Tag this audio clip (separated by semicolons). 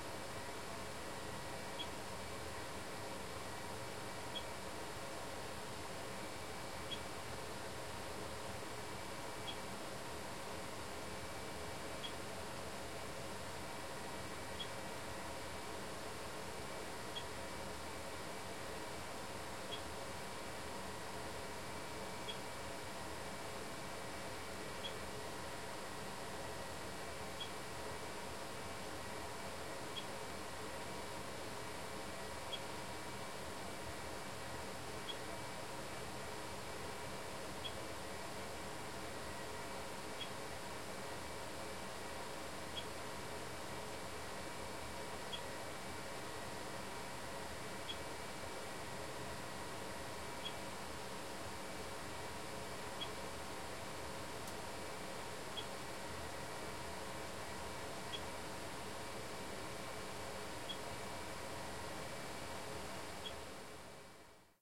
Ambience; Beep; Computer; Room; Small